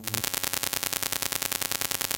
The CPU of this keyboard is broken, but still sounding. The name of the file itself explains spot on what is expected.